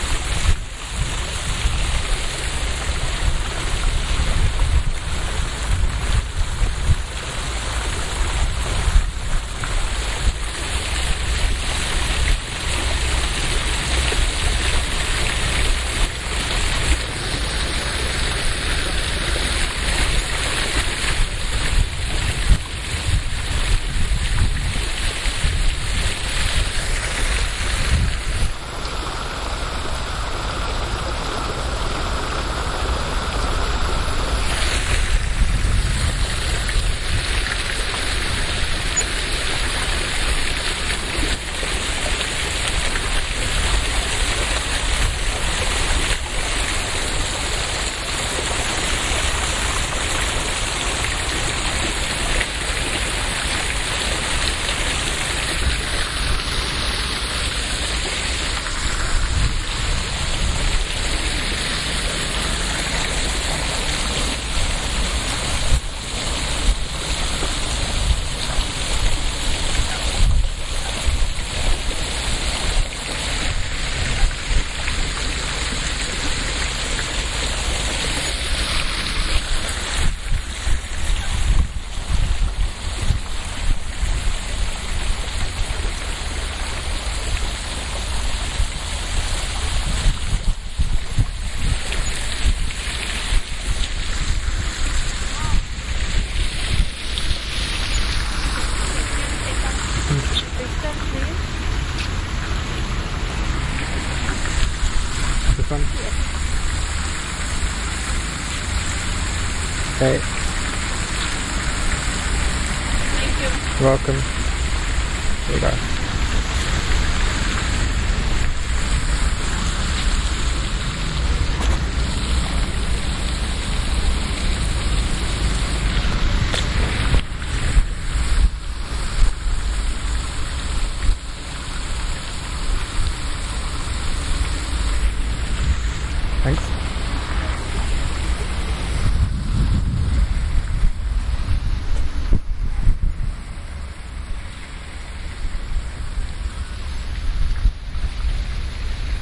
Lancaster Gate - Small water fountain
ambiance, ambience, ambient, atmosphere, background-sound, city, field-recording, general-noise, london, soundscape